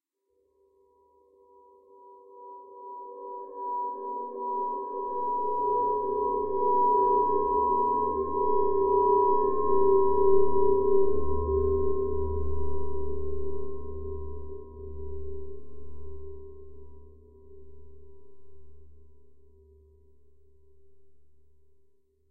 a drone produced from heavily processed recording of a human voice
drone, processed, voice